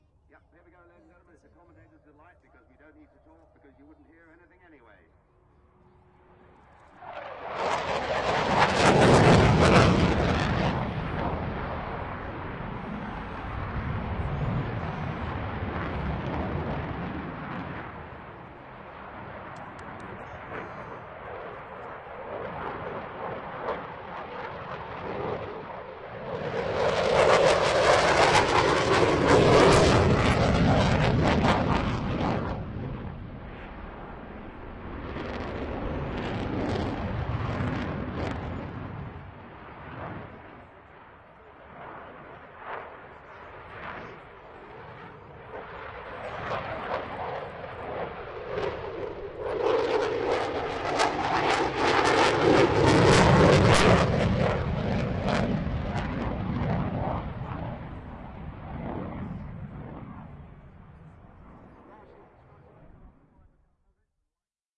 Eurofighter at Dunsfold 2009
Recorded at the 2009 Wings and Wheels event at Dunsfold Park in Surrey, using a Zoom H2. Recorded using just the rear pair of built-in microphones with AGC off and Limit 1 on.
zoom-h2, jet, eurofighter, aircraft, field-recording